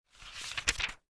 page turn02
this is another page turn sound recorded by me in a very high quality.
i want to improve my work, so, please, comment on my posts,
thank you!
field-recording, office-sounds, page-turns, books, magazines